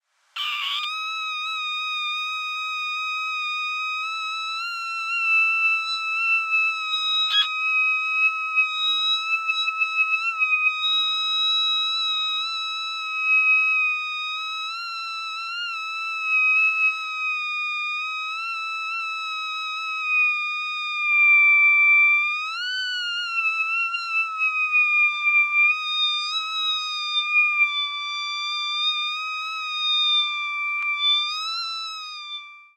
High Pitched Mandrake
My version of the mandrake creature featured in the Harry Potter films.
fx, harry-potter, high-pitch, mandrake-scream